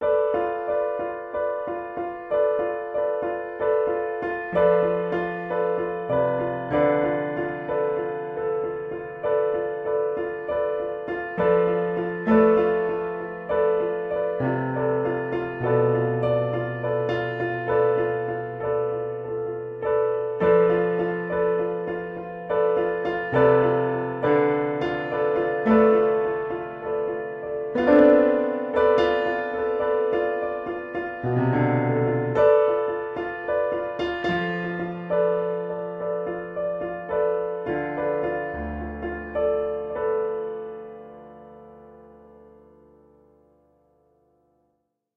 Melodic piano released as part of an EP.